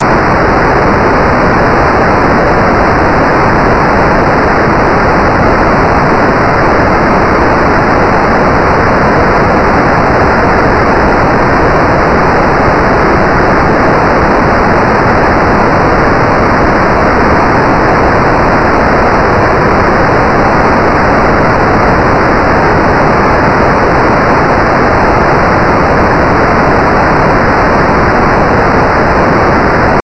15 LFNoise1 3200Hz
This kind of noise generates linearly interpolated random values at a certain frequency. In this example the frequency is 3200Hz.The algorithm for this noise was created two years ago by myself in C++, as an imitation of noise generators in SuperCollider 2.
frequency, interpolation, linear, low, noise, ramp